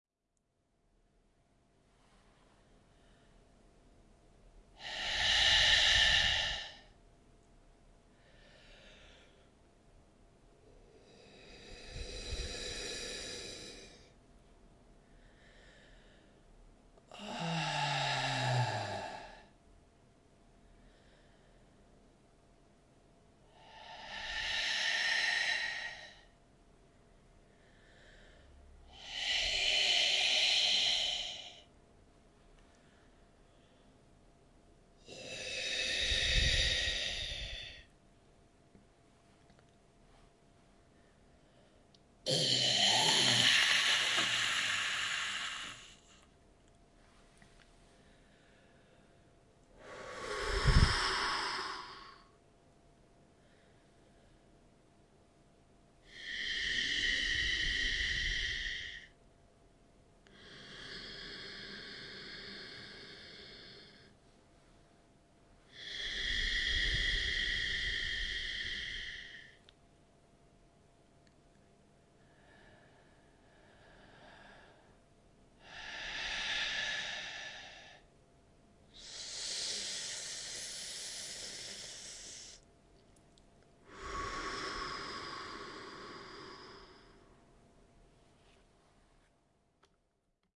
i thought i record some breaths, tried to variate it a bit.
for example there is an inhale and exhale with the yogic ujjayi breath and some other ones.
i used some of these in drone pieces, they blend it pretty well.
thats unprocessed raw: just recorded direct into Ableton 9 with Zoom H2n.
Yogi, Breath, Scary, Male, ZoomH2n, Variations